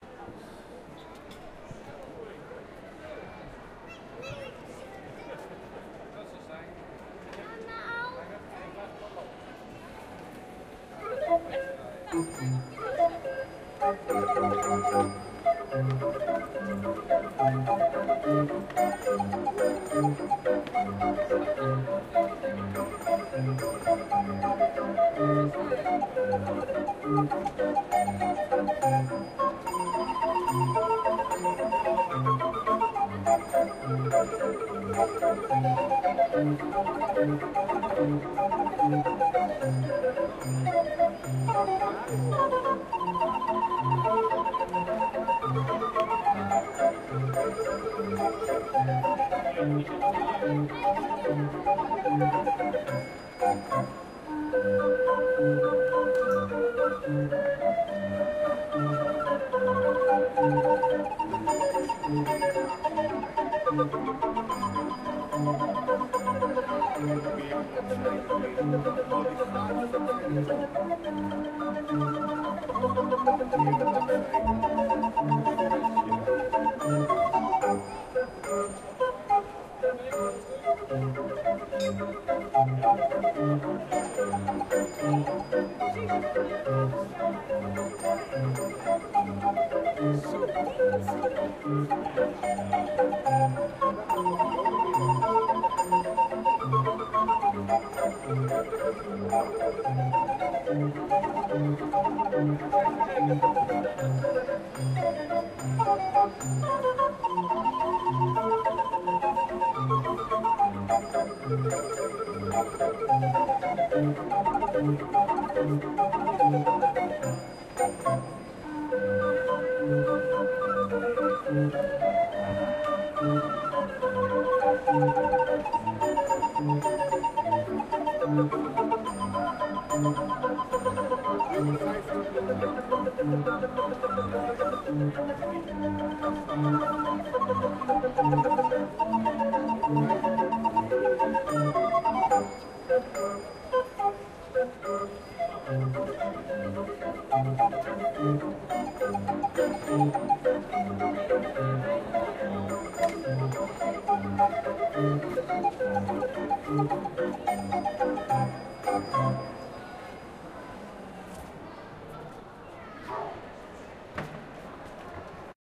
Street organ playing a popular tune in a big wharf hall during a harbour fair in Vlissingen, Netherlands. Zoom H4n